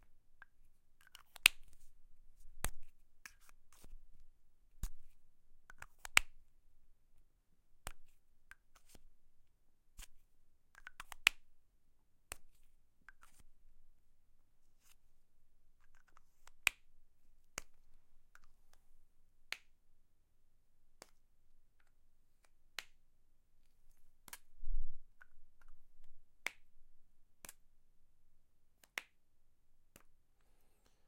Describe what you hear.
Uniball Pen Cap Manipulation
Recorded on an SD 702 with an SM81 and a cheap akg SDC can't remember which one just wanted variety. Not intended as a stereo recording just 2 mic options.
No EQ not low end roll off so it has a rich low end that you can tame to taste.
uniball, remove, cap, put-on, pen